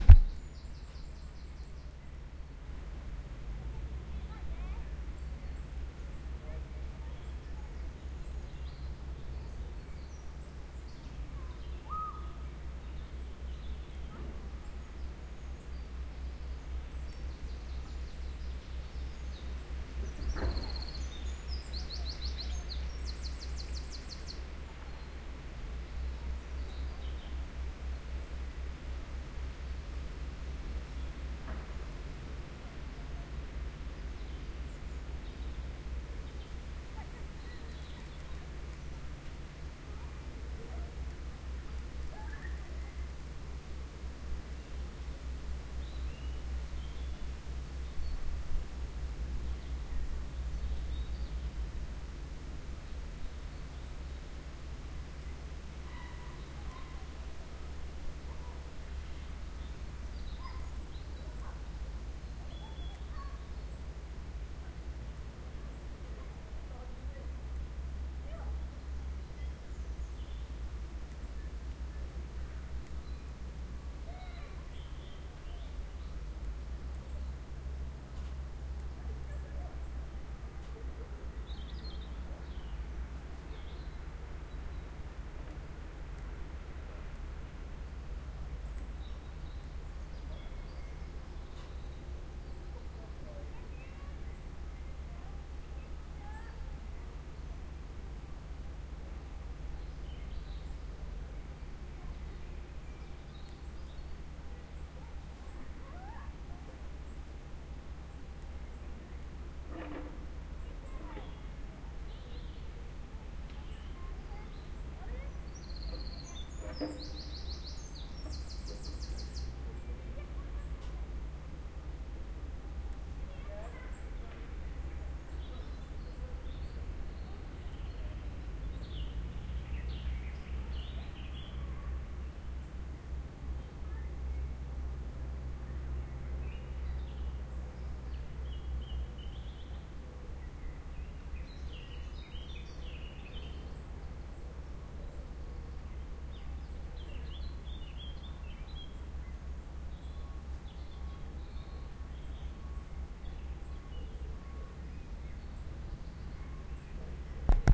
Cannock Soundscape.
Recorded on 13/05/2020 at 2pm.
ambience, atmosphere, soundscape, background, ambient